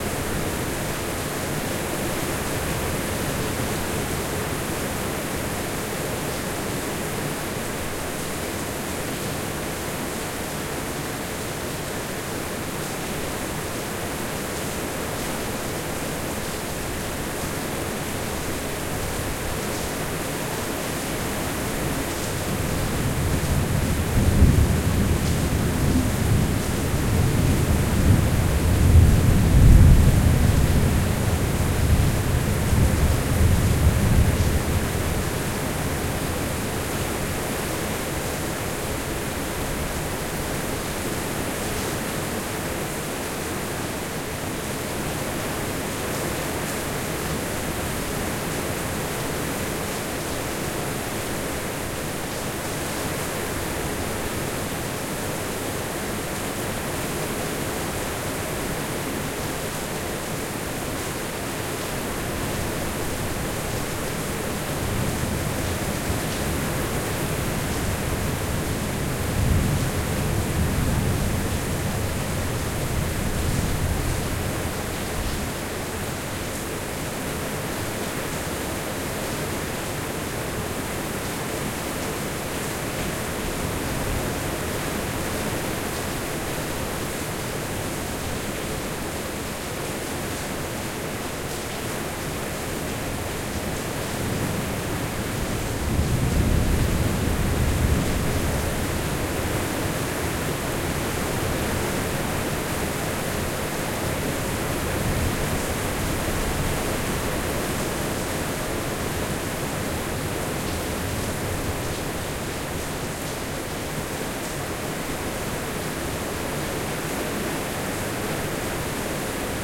20160621 Bangkok Thunderstorm 3
Recorded a thunderstorm hitting bangkok in my house using 2 MXL 551 microphones in A/B setup.
weather, thunder, storm, rain, field-recording, thunderstorm, lightning